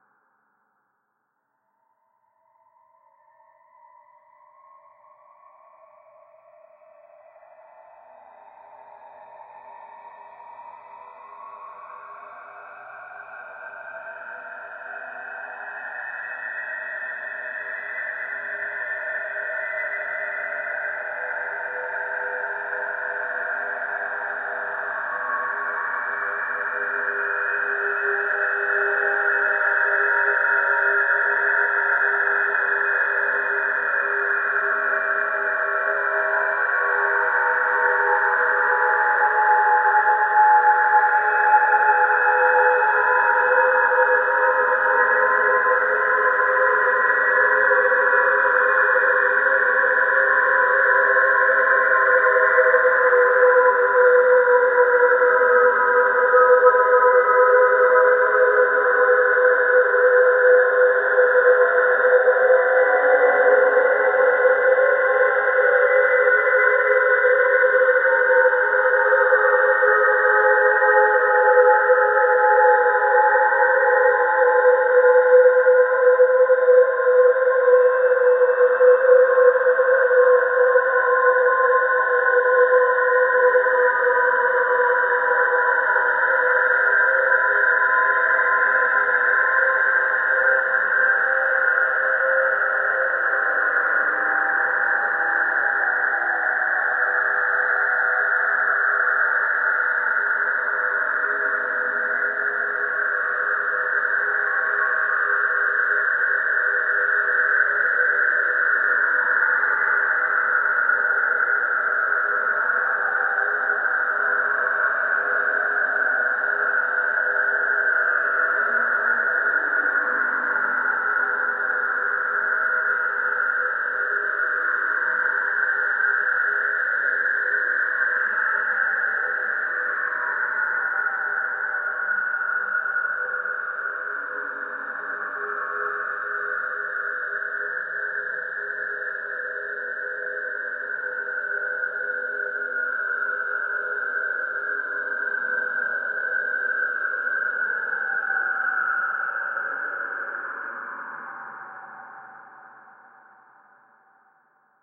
LAYERS 009 - UltraFreakScapeDrone - D#4
LAYERS 009 - UltraFreakScapeDrone is an extensive multisample package containing 97 samples covering C0 till C8. The key name is included in the sample name. The sound of UltraFreakScapeDrone is already in the name: a long (over 2 minutes!) slowly evolving ambient drone pad with a lot of movement suitable for freaky horror movies that can be played as a PAD sound in your favourite sampler. It was created using NIKontakt 3 within Cubase and a lot of convolution (Voxengo's Pristine Space is my favourite) as well as some reverb from u-he: Uhbik-A.
ambient, artificial, drone, evolving, freaky, horror, multisample, pad, soundscape